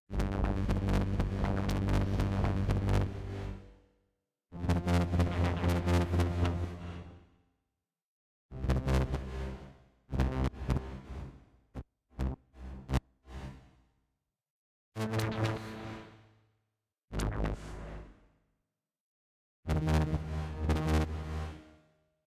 Electric buzz sound with a lot of power.